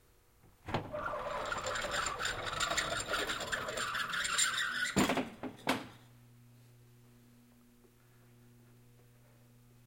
Rolling Creak
from inside a 1960's Canadian Emergency Government Headquarters or "Diefenbunker"
Binaural recording using CoreSound mics and Marantz PMD 661 48kHhz
military, heavy, doormetal